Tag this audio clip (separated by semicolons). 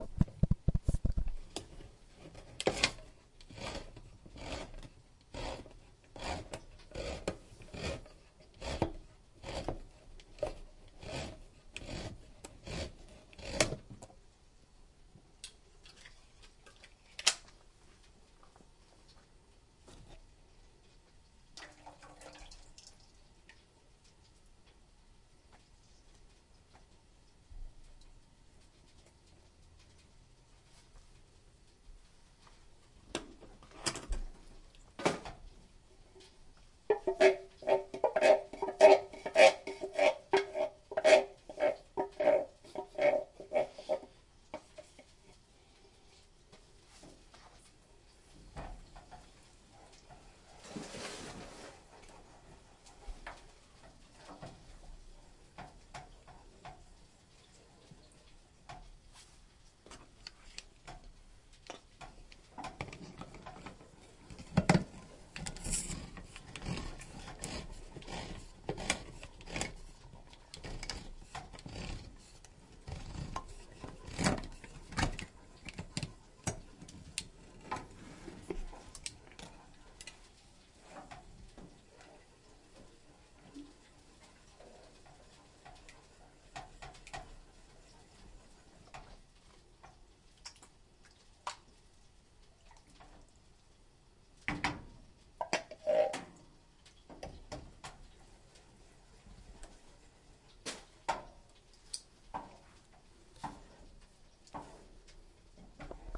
draining; spaghetti; water